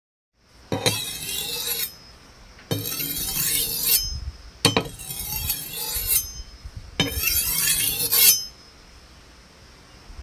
Knife Sharpening Sound
The sharpening of a kitchen knife. Realistic sound for any knife/sword sharpening.